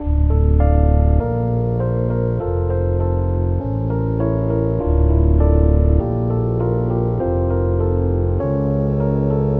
quelqu'onkecocobango
sample, melancolic